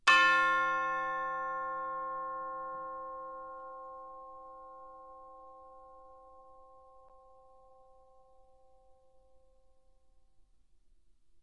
Instrument: Orchestral Chimes/Tubular Bells, Chromatic- C3-F4
Note: C, Octave 1
Volume: Forte (F)
RR Var: 1
Mic Setup: 6 SM-57's: 4 in Decca Tree (side-stereo pair-side), 2 close
bells, chimes, decca-tree, music, orchestra, sample